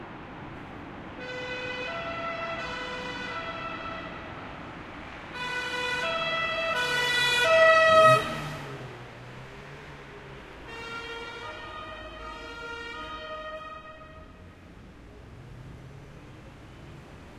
STE-040 italian police siren
While walking the streets of Rome at night, a police car goes by with its siren on. Good demonstration of Doppler effect.